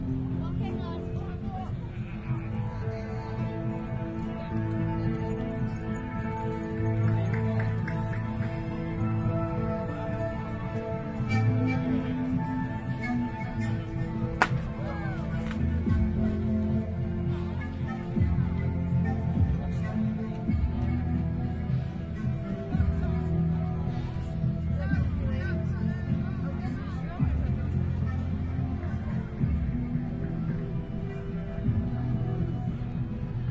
South American musicians playing at The Mound, Edinburgh. Soundman OKM > Sony MD > iRiver H120
field-recording, ambiance